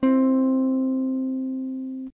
Jackson Dominion guitar. Recorded through a POD XT Live, pedal. Bypass effects, on the Mid pickup setting.